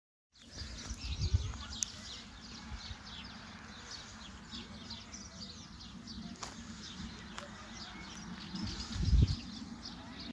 birds singing
deltasona, pajaros